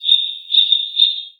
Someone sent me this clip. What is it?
A piece of Nature. Individual bird chirps and phrases that were used in a installation called AmbiGen created by JCG Musics at 2015.
bird, birds, birdsong, field-recording, nature